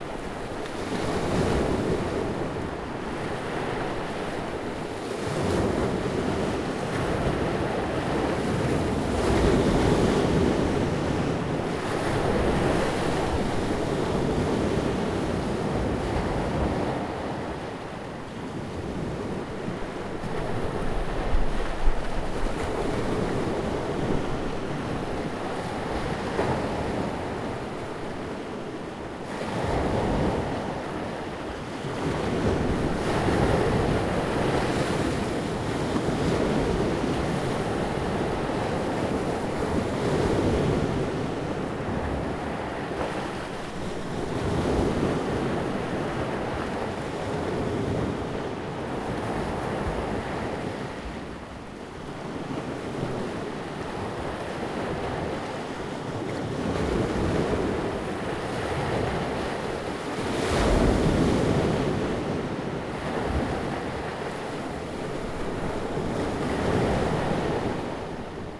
Sound of waves on the rocky seashore, recorded on the island Vis, Croatia.